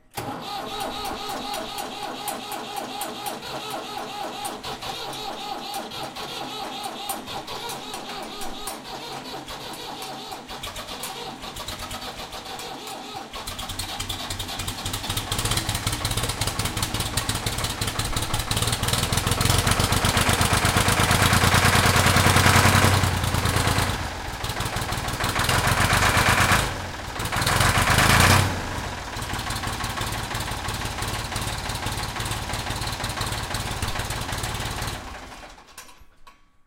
FP Diesel Tractor Start Run
Ford 4000 Diesel Tractor starts and runs in cold weather.